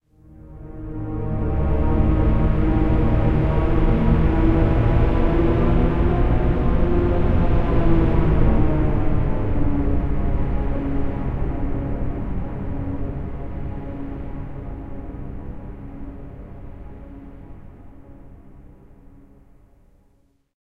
The dark side of the force just gets on going, grabs everything in it's path without the remorse to let go of what it got in it's grasp... Created with SampleTank XL and the Cinematic Collection.
panorama, disaster, dystophy, shock, horror, sceneario, madness, shiver, stinger